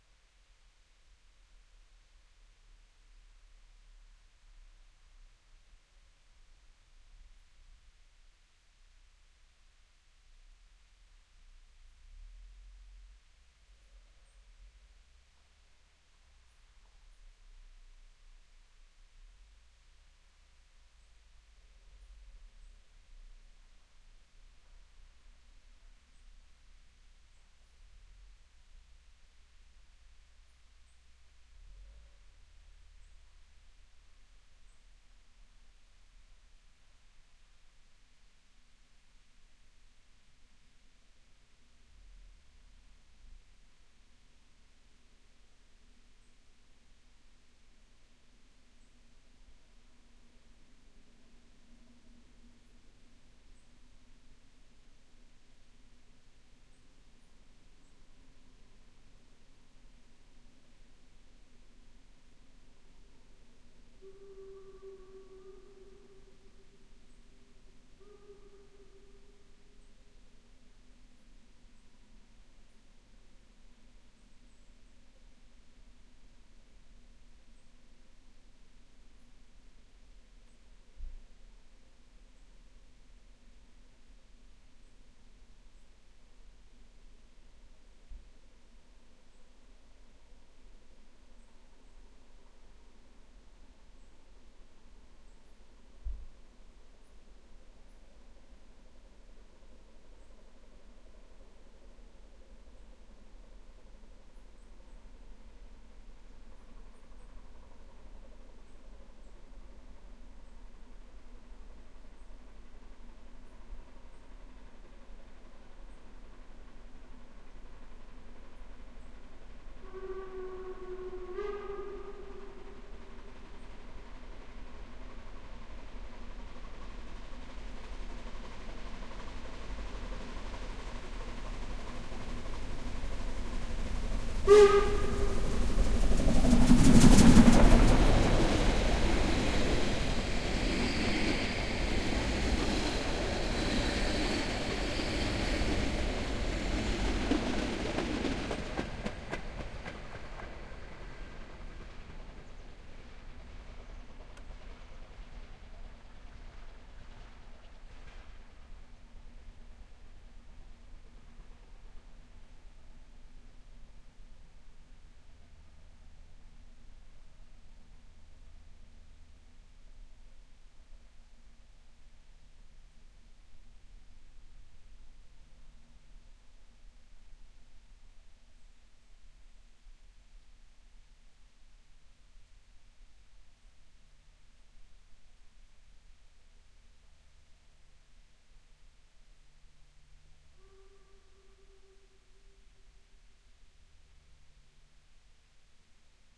steam train
Dear freesounders, I´m so happy with this recording. I managed to put up those Primo EM 172 microphones just in time to record this train, which went by s. th. like 2 yards from those microphones. And it turned out alright! : )All that in the Harz mountains, the train had a couple of carriages and: I rest my case!
P.s.: the whitenoise is that of the river Holtemme.